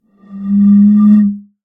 Bottle blown 08
Some sounds of blowing across the top of a glass bottle.
Specifically a 33cl cider bottle.:-)
Captured using a Rode NT5 small-diaphragm condenser microphone and a Zoom H5 recorder.
Basic editing in ocenaudio, also applied some slight de-reverberation.
I intend to record a proper version later on, including different articulations at various pitches. But that may take a while.
In the meanwhile these samples might be useful for some sound design.
One more thing.
It's always nice to hear back from you.
What projects did you use these sounds for?